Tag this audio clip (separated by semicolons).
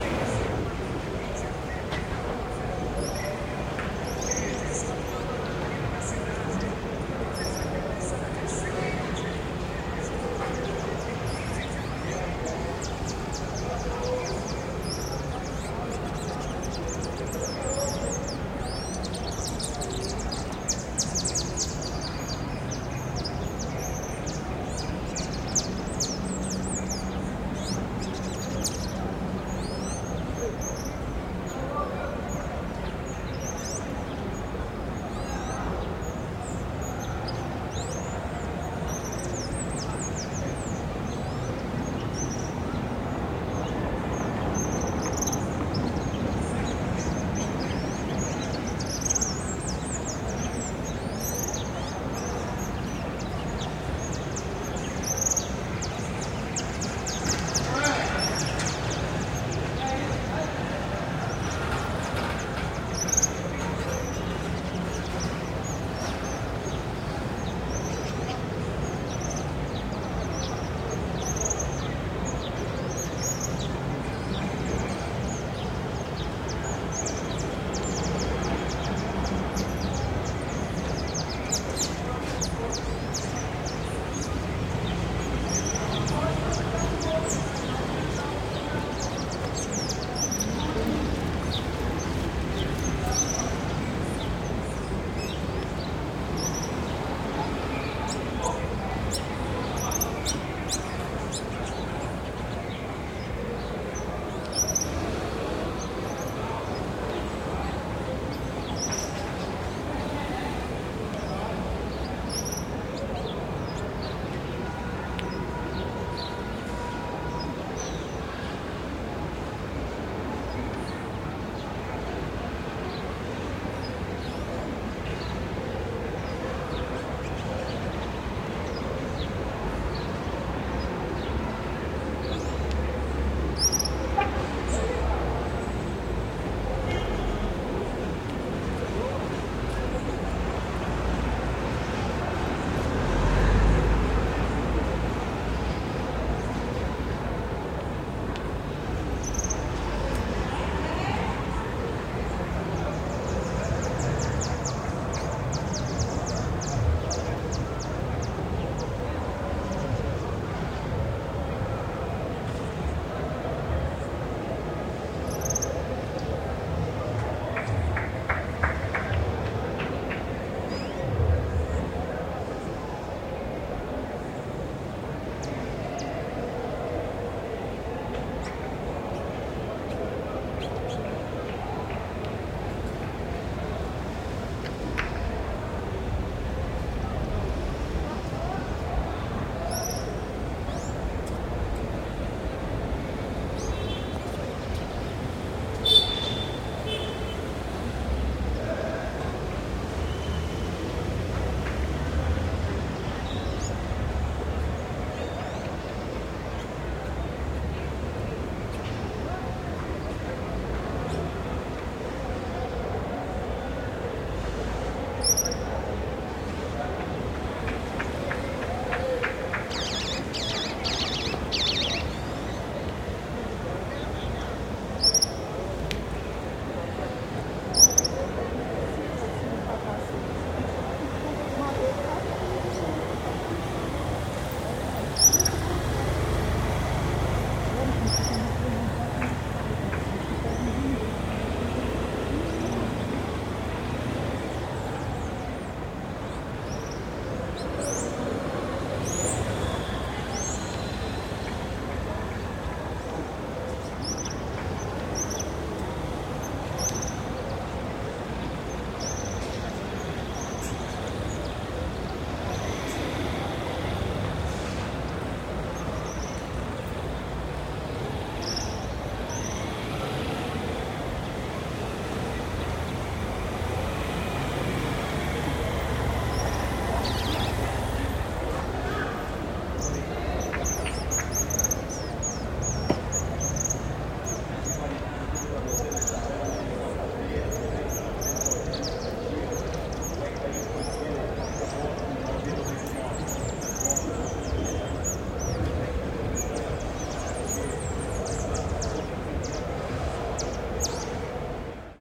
ambient; ambiente; birds; burburinho; buzz; carros; cars; cidade; city; day; dia; field-recording; moto; motorcycle; noise; passaros; rua; ruido; street; traffic